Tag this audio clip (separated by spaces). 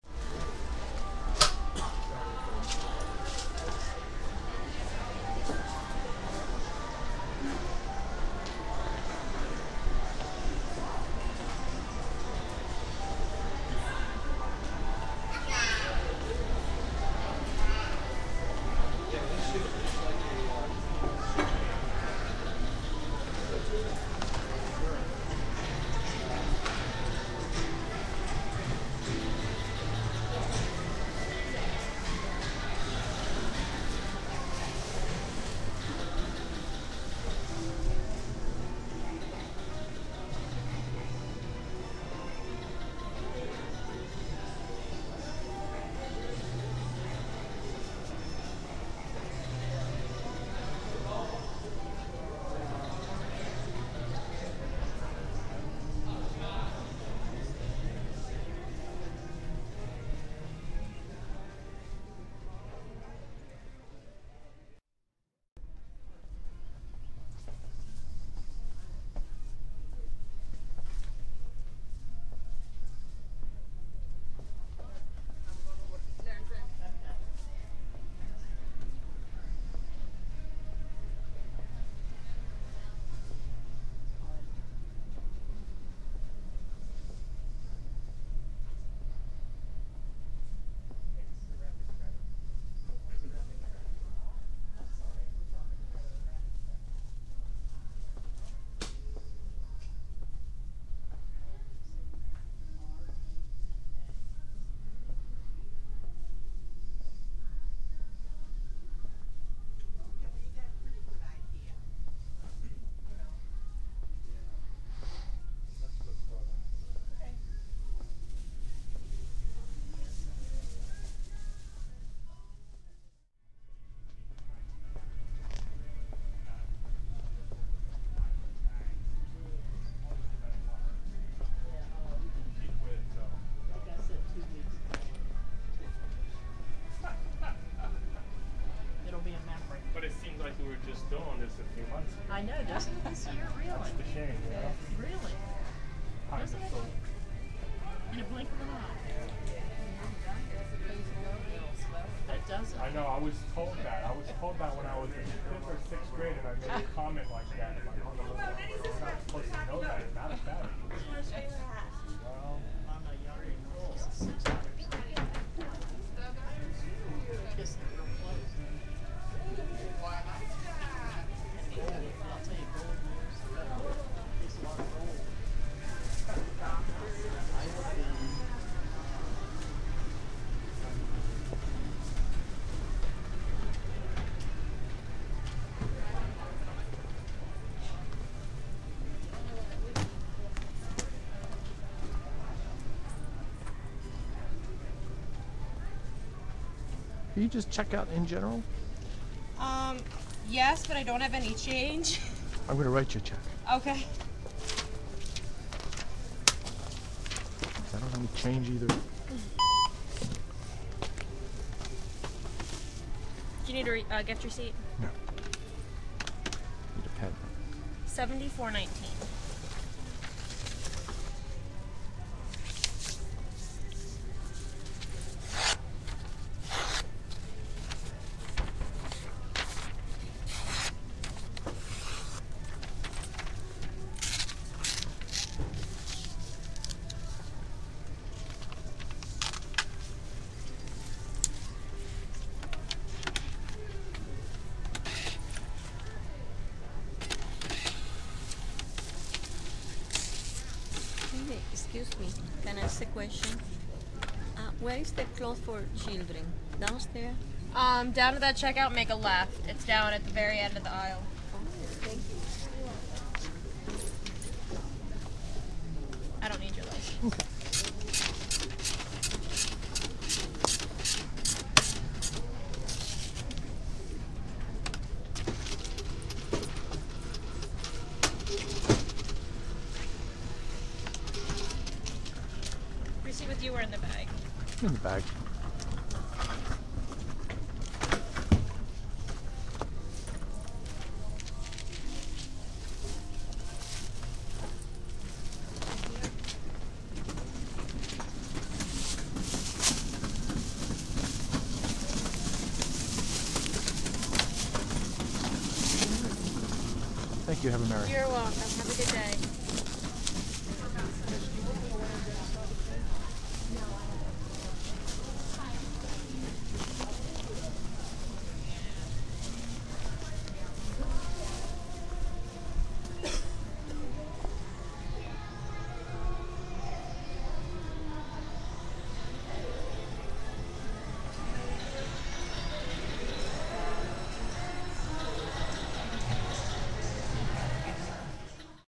ambience christmas mall shopping